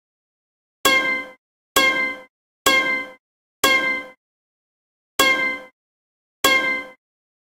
Some plucks with old zither instrument recorded at home, retuned in Ableton.